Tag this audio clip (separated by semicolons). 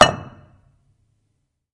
concrete; strike; impact; stone